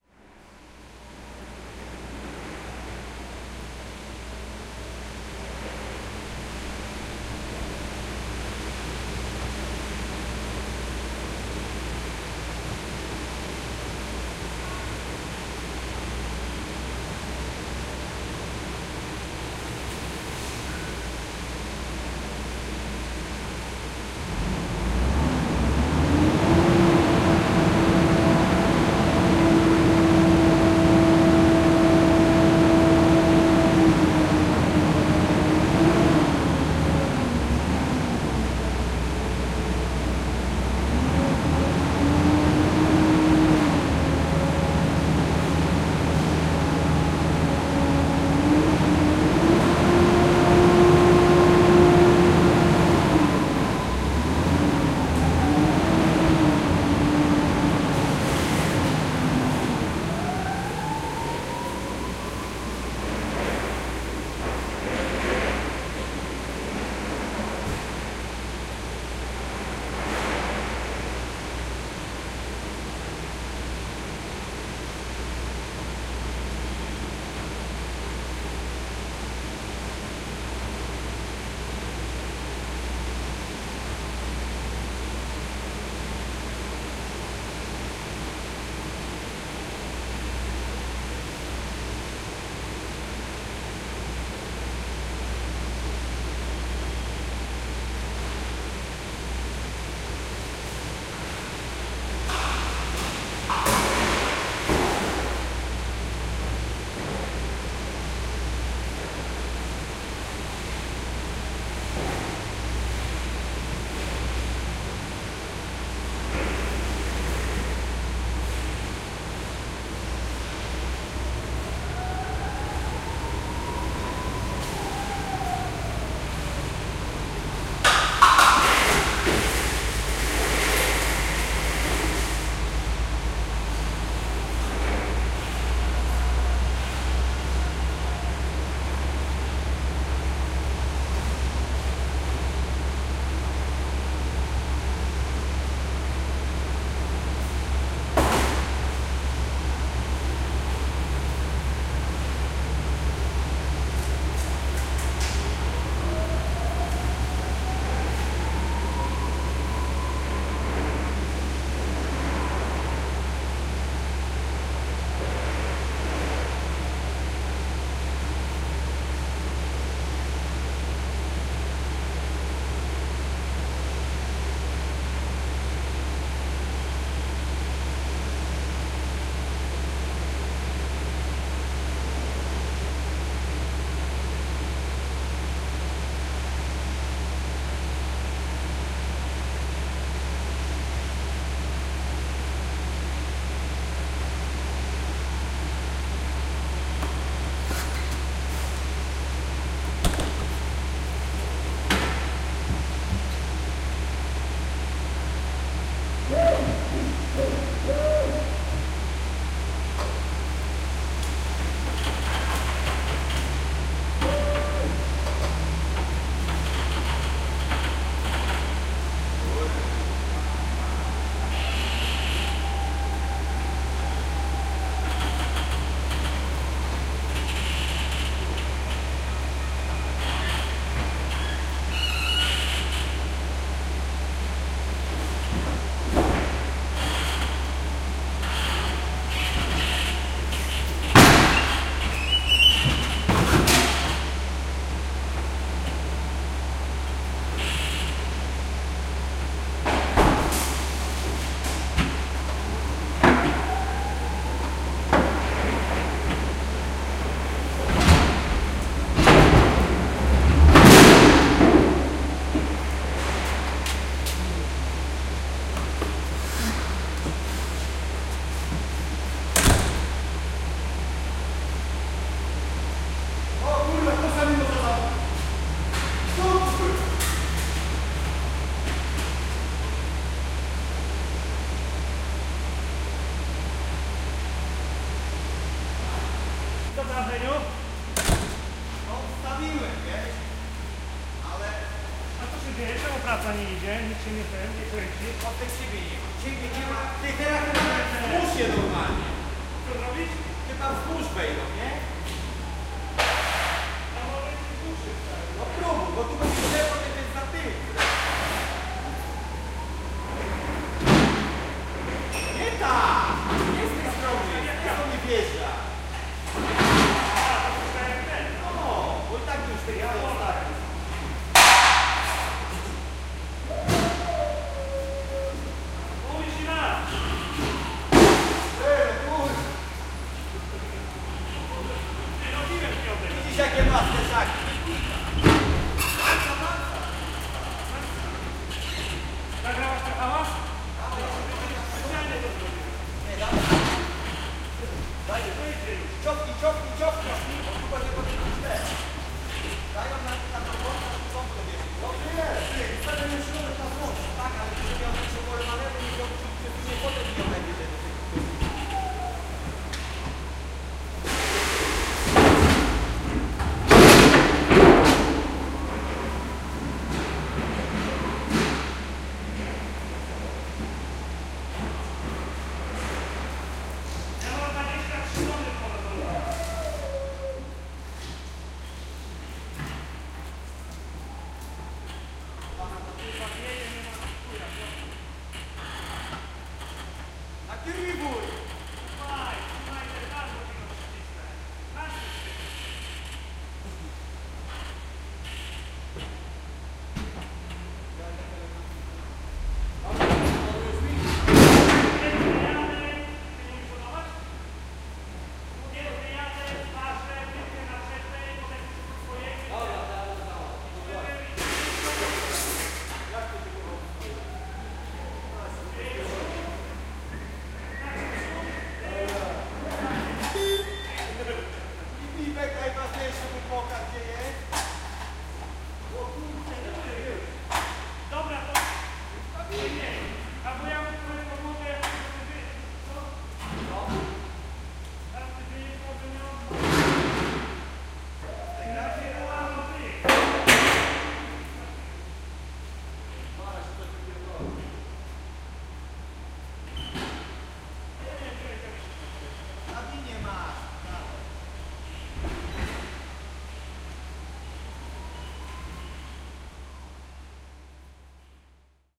110807-factory hall
07.08.2100: eighth day of the ethnographic research about truck drivers culture. Oure in Denmark, fruit-processing plant, loading hall with two loading rap. Loading redcurrant. Sound of tractorlift, forklift looking like chariot, conversation between truck drivers.
forklift, hall, squealing, denmark, voices, truck, ambience, buzzing, field-reording, people, conversation, engine, oure, noise, drone